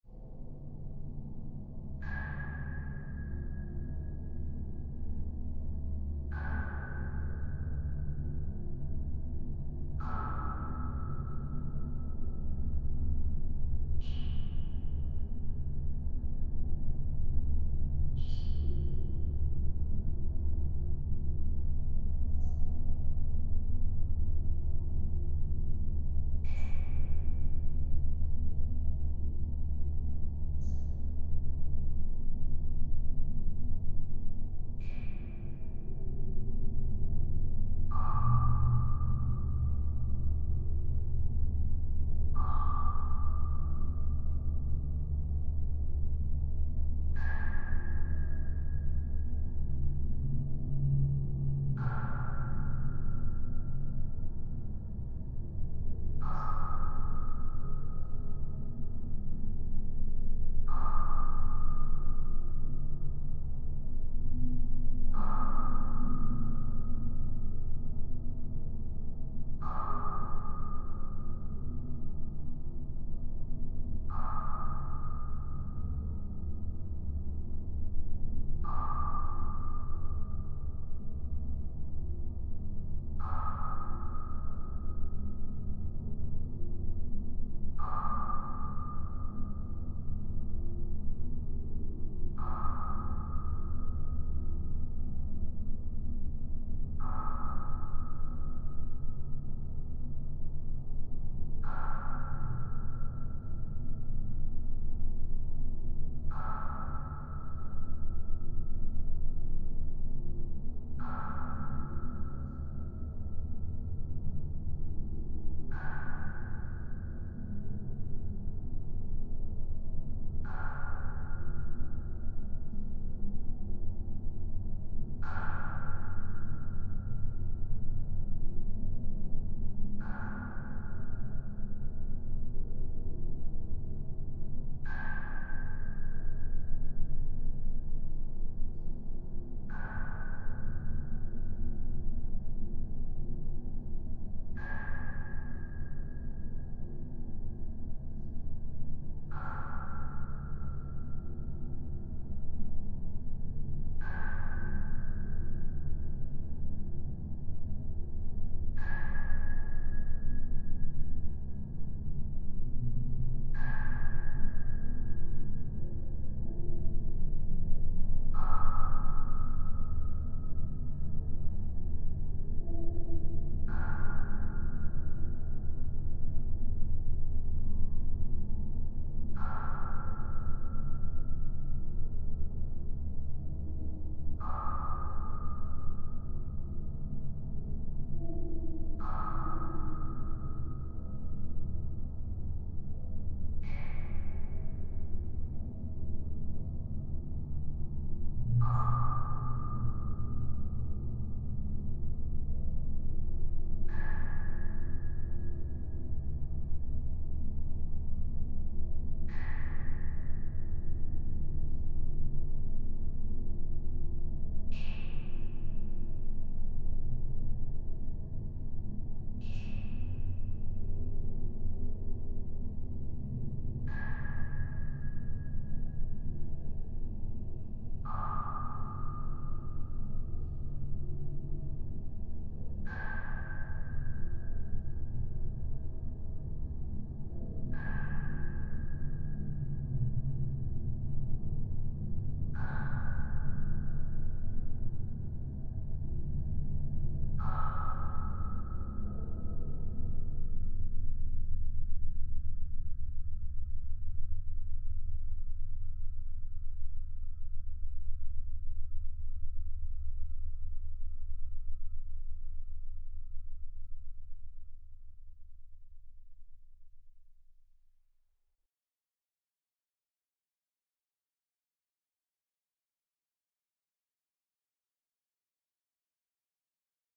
drops & drone,sfx
a recording of water-drops & mechanical ventilation with added reverberation effect.
KM201-> ULN-2-> DSP